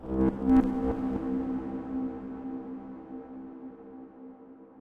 Distorted Low Siren
Industrial sounding heavily distorted sweep with a big reverb tail
sound-effect, fx, distortion